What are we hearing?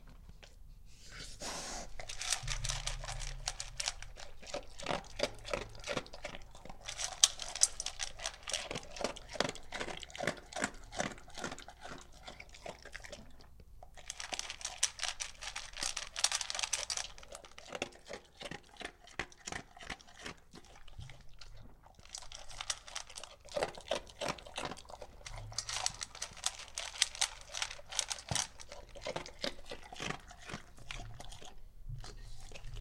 This is a recording of an english labrador eating.
eating, chow, bowl, food, dog